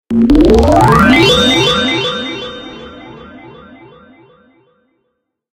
Made with multiple layers in Ableton Live